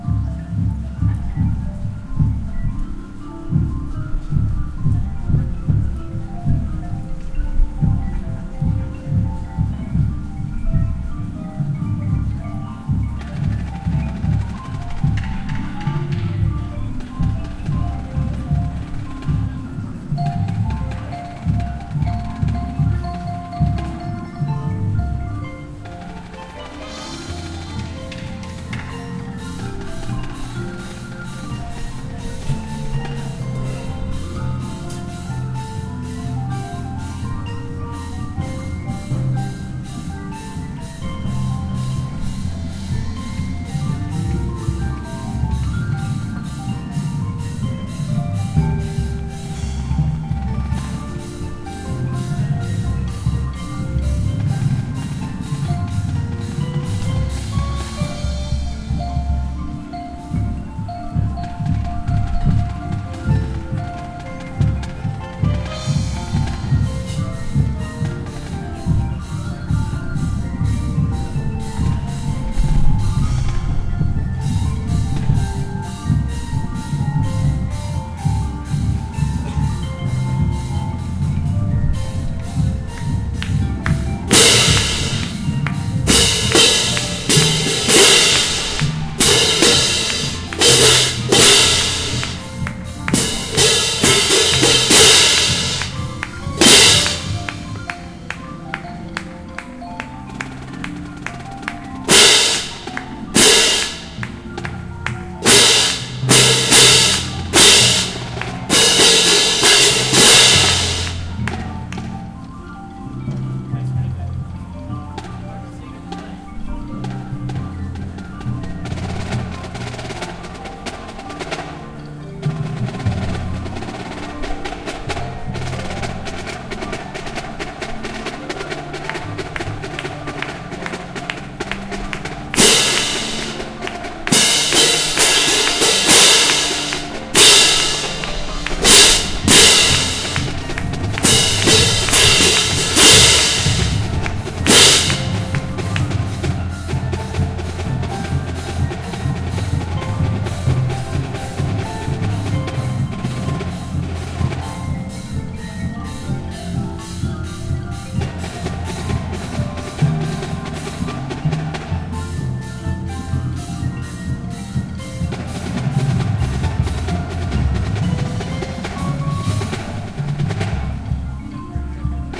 Prominent Cymbals and Xylophones
Cymbals and xylophones practicing.
percussive, cacophonous, percussion, college, music, practicing, cymbals, prominent-cymbals-and-xylophones, football, practice, ambience, band, band-practice, noisy, prominent, xylophones